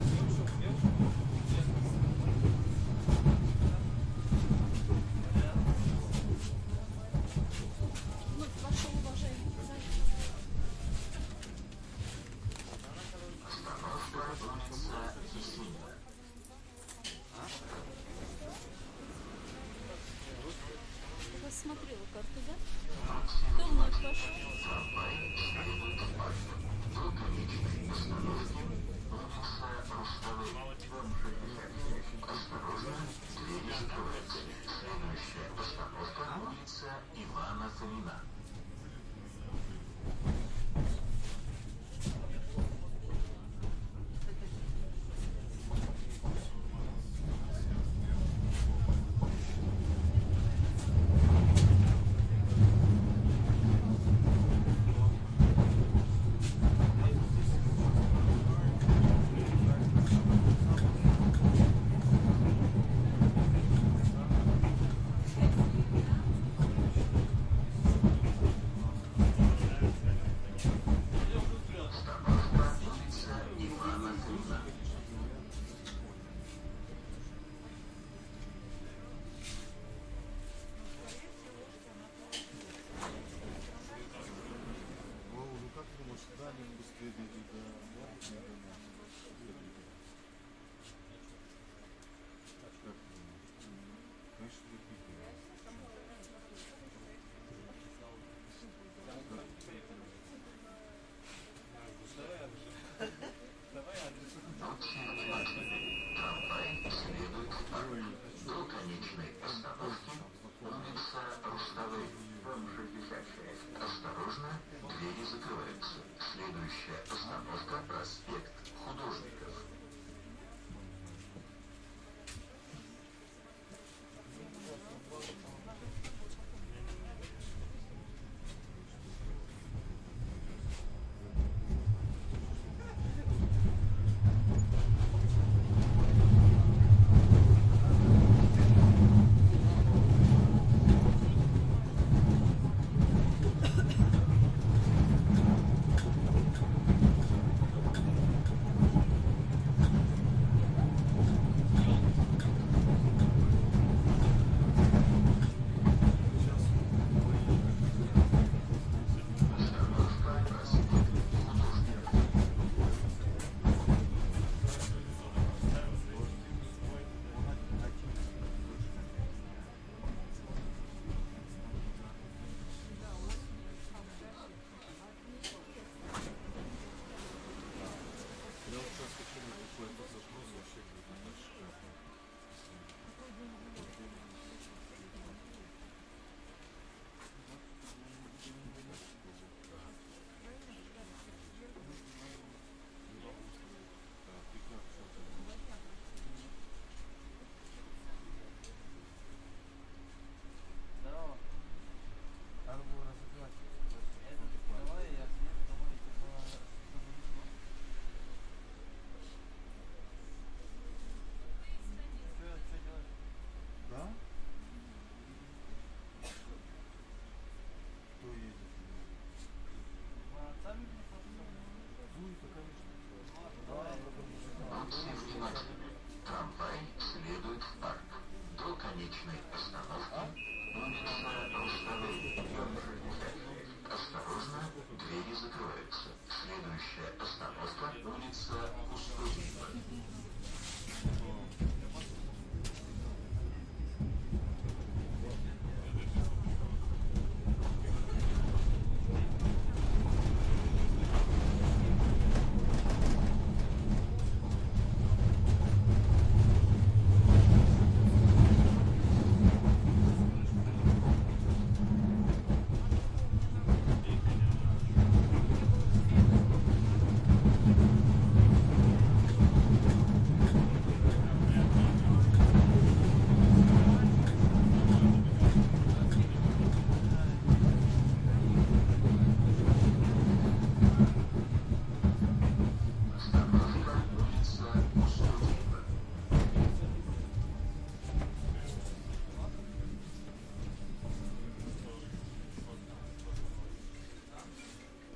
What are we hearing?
traim inside
traveling inside train
field-recording, street, public-transport, Tramway, city